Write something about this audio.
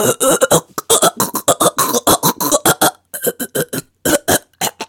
A guy getting choked.
choke, choking, cough, male